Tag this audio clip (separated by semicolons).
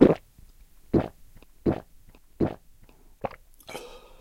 drink; liquid; swallow; throat; water